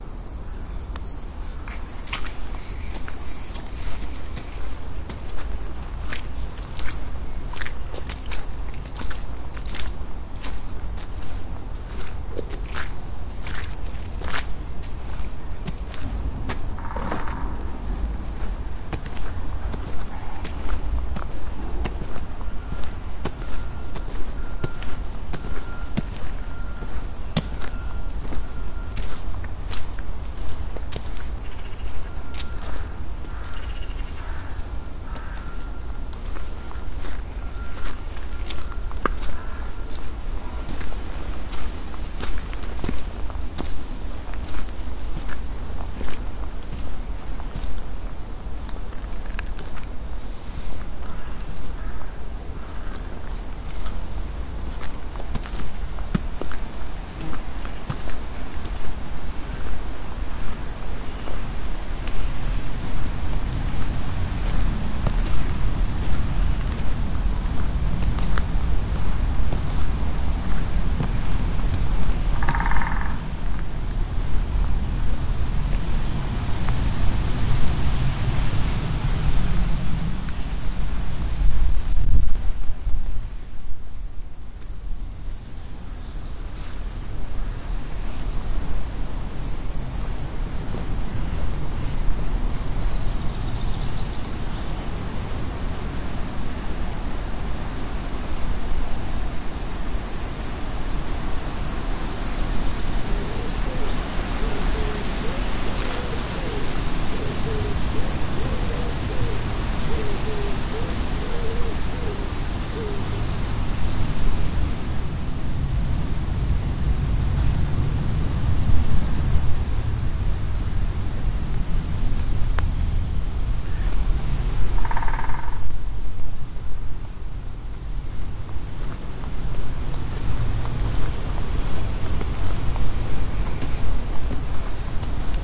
Walking through Dawsholm Park in the woods on a drizzly, windy but warm day. Birds chirping in the background. Swampy underfoot steps in wellies. My Spaniel dog is scurrying about too. Recycling plant machinery can sometimes be heard in background A Woodpecker can also be heard on a few occasions